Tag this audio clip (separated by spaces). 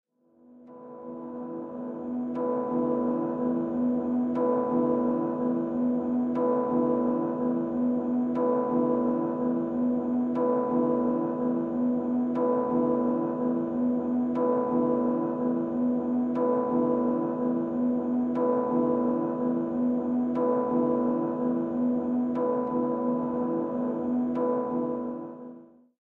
soundscape artificial drone ambient